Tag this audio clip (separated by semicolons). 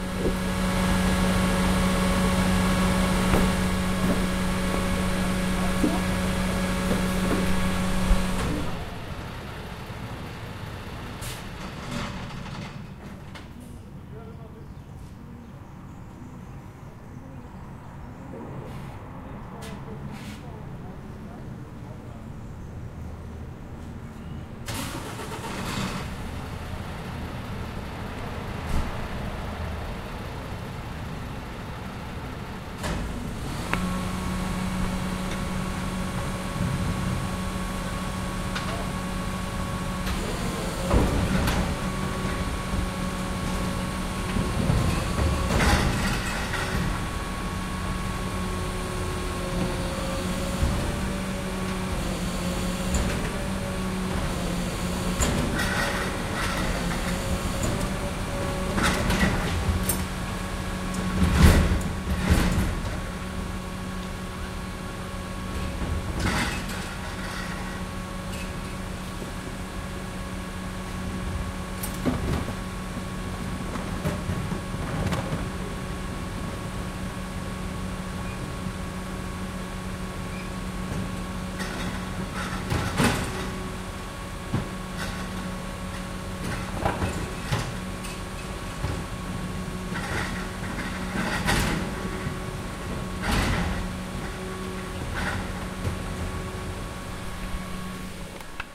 ambiance; field-recording